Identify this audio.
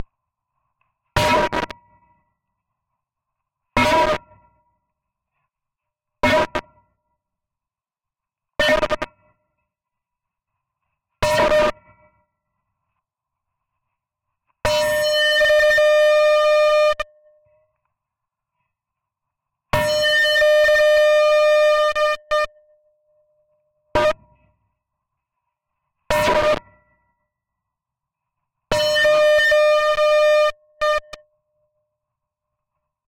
Heavy Steel Pipe 01 Signal Cuts
The file name itself is labeled with the preset I used.
Original Clip > Trash 2.
cinematic, clang, clank, distortion, drop, hit, horror, impact, industrial, metal, metallic, metal-pipe, percussion, ping, resonance, ringing, scary, sci-fi, smash, steel, steel-pipe, strike, sustained